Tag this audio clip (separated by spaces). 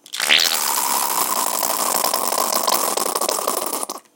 crap diarrhea fart toilet